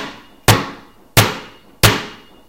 eduardo balon 2.5Seg 12
bounce, bouncing, ball